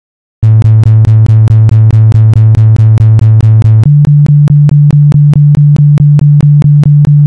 A plucked string synth timbre which sounds like a bass drum and synth bass playing together arranged in the most simple of patterns. This was made with CSound, using its 'pluck' opcode.